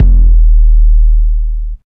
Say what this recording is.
Ultra Subs 003
Ultra Subs were created by Rob Deatherage of the band STRIP for their music production. Processed for the ultimate sub experience, these samples sound best with a sub woofer and probably wont make alot of sound out of small computer speakers. Versatile enough for music, movies, soundscapes, games and Sound FX. Enjoy!
bass, drum, kick, lowend, thump, strip, subs